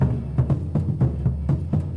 bombo loop
thats some recordings lady txell did of his percussion band "La Band Sambant". i edited it and cut some loops (not perfect i know) and samples. id like to say sorry for being that bad at naming files and also for recognizing the instruments.
anyway, amazing sounds for making music and very clear recording!!! enjoy...
batukada, batuke, kick, loop